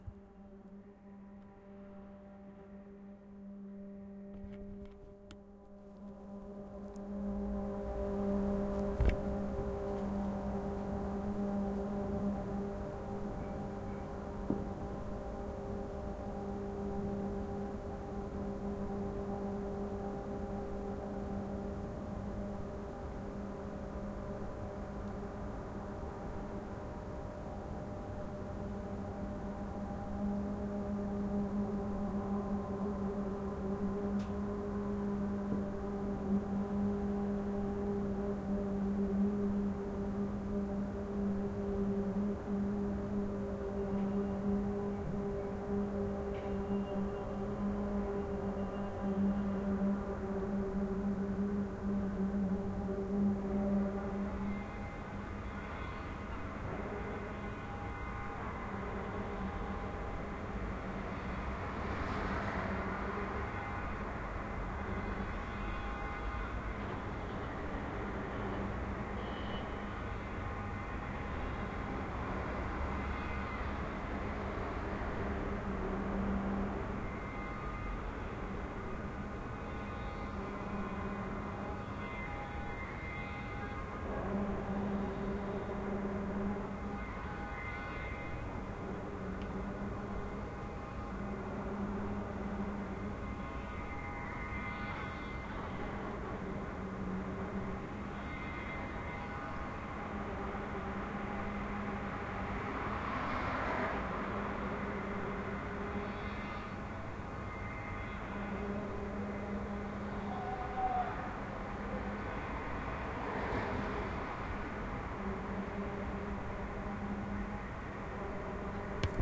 Ominus machine sound
Recorded from my hotel window in Handan China very late at night. This sound woke me up and I had to record it. I could see this spotlight in the distance but could not see the machine that was making it.
horror, large